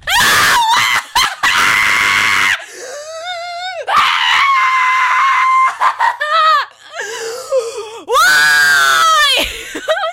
screaming and why
WARNING: REALLY LOUD
EXTREMELY angry after losing a game.